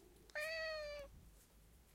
Cat meow 5
cat, mew, miau, meow